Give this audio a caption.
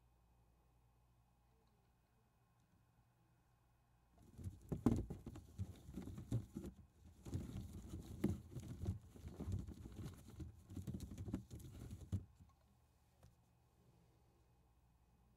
Stirring Baseballs FF113
Stirring Baseballs Thump bump movement
Stirring, Thump